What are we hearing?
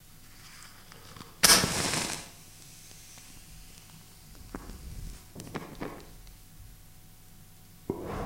Striking a match. Recorded on MD with dynamic Microphone. Little Roomverb.